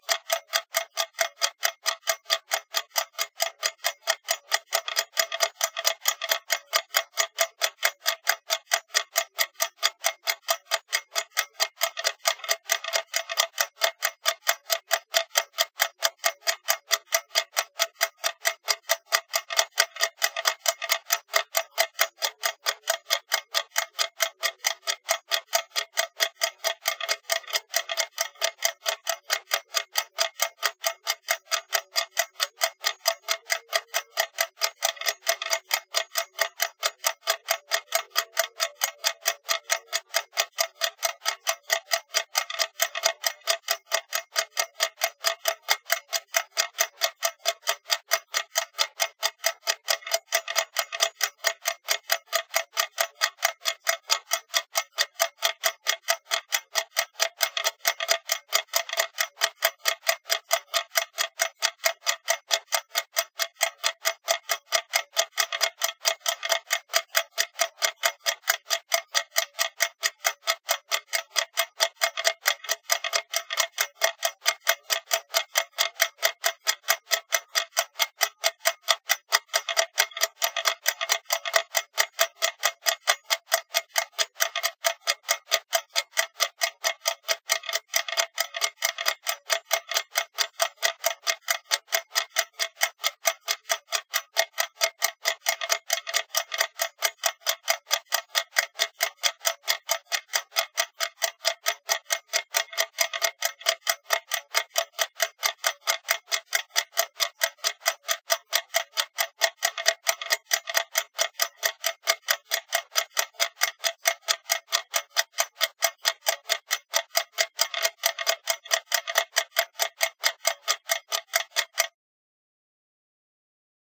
Mechanical Clock Movement Ticking
2 minutes of ticking and tocking. There are some nice secondary clicks and slides. Blue Yeti